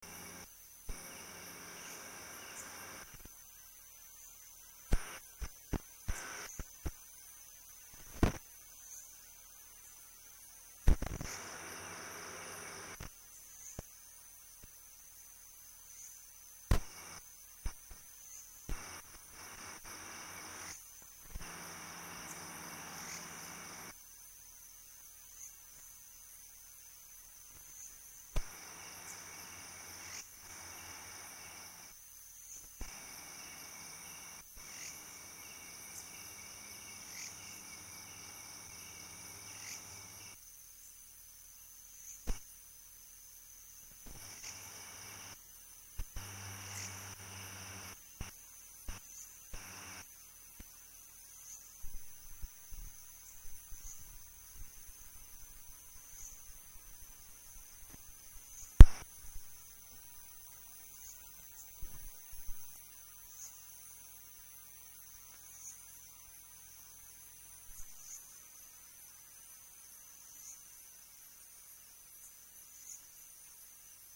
insects chirping in the gutters late one night in Chiang Mai.
They were recorded using a sony stereo lapel mic on a hacked iRiver H400 running Rockbox.